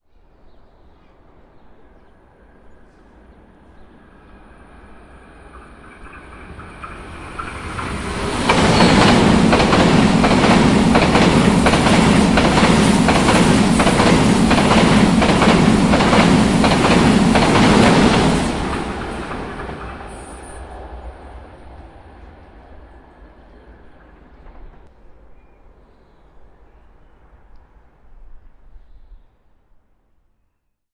Passing ICE-Train
The german highspeed-train ICE passing at a station near Stuttgart with an approx. speed of 100 km/h - recorded with Zoom H2
Passing, Trains